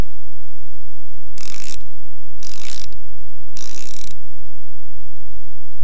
music box wind up craches